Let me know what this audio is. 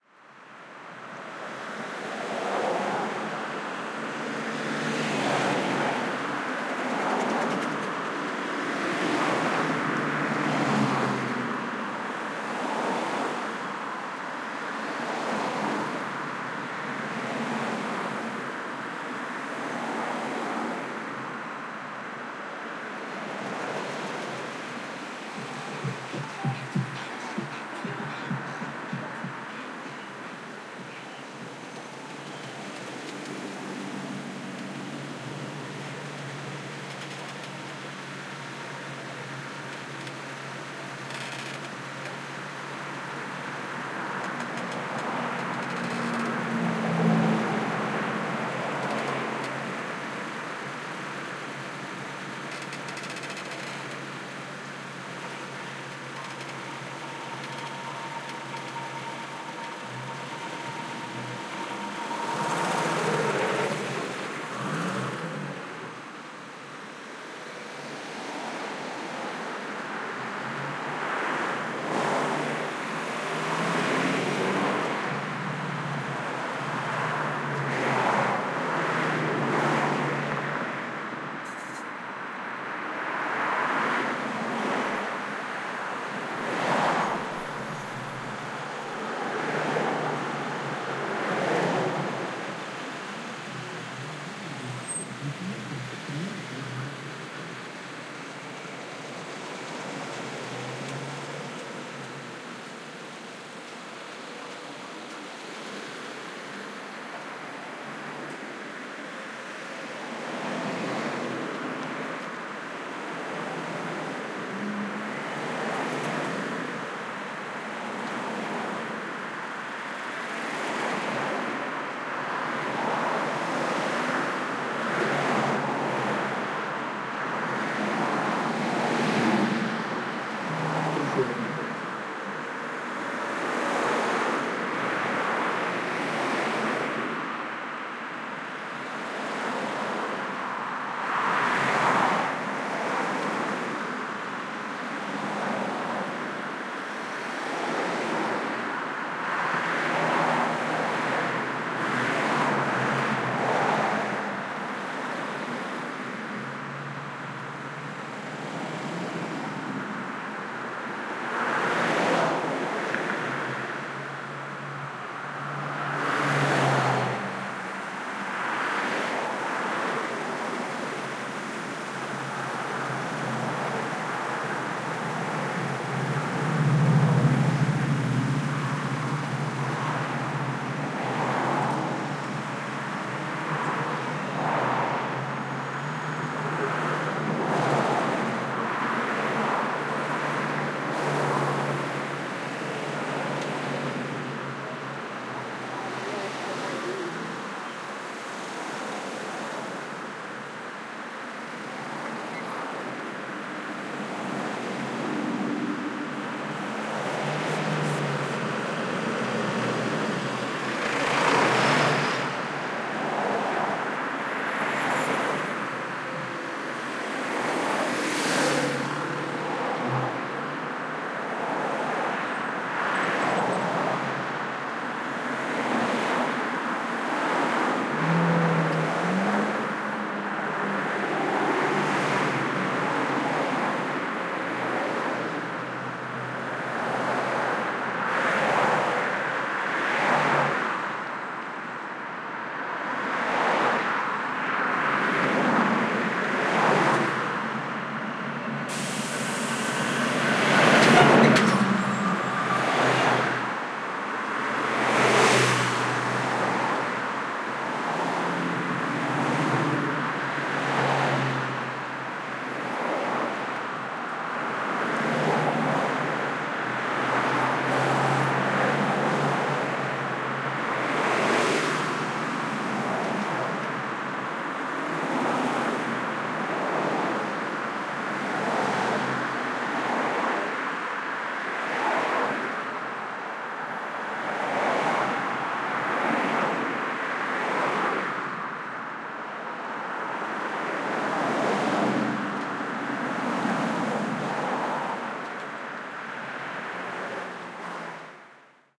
VHCL S Coldwater StopAndGo 001
I recorded stop and go traffic on Coldwater Canyon one morning on my way to work. Nice variation of traffic that's moving and traffic that's just stopped and idling. Could be used for freeway traffic jams, etc.
Recorded with: Sound Devices 702t, Beyer Dynamic MC930 mics
stop-an-go, vehicles, freeway, cars, highway, traffic-jam, city, traffic, jam